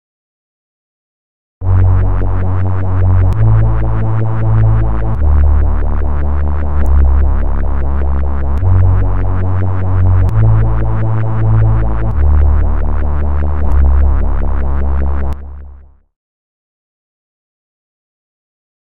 Short bass made with triple oscillator synth in Linux Multimedia Studio
short, bass, synth